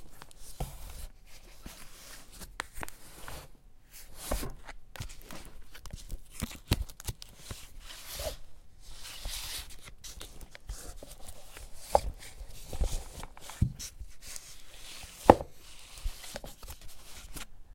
Bookshelf, find books
It's a recording of me trying to find several books in a bookshelf.
book, books, bookshelf, find, shelf